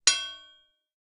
anvil strike 1
The sound of what I imagine a hammer striking an anvil would probably make. This was created by hitting two knives together and resampling it for a lower pitch.